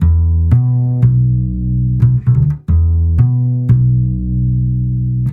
Jazz Bass A 3
jazz, music, jazzy
funky, jazz, jazzy, music